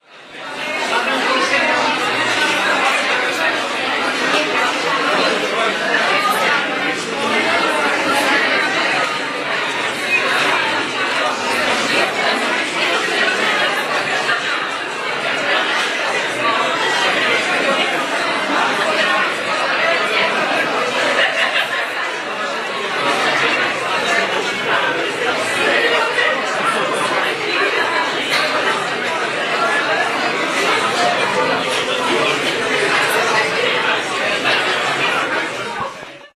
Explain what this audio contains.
01.10.10:18.00-18.30. the Sweetness exhibition vernissage in Arsenal Gallery on Old Market Square in Poznan/Poland.